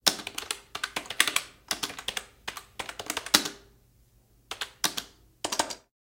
sounds of typing on a keyboard